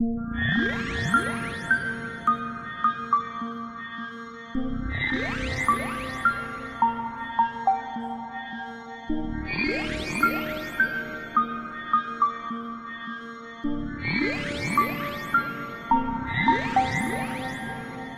Nice melodic loop. cheers :)
reverb, delay, chill, chillout, melodic, synthesizer, melody, pling, loop, synth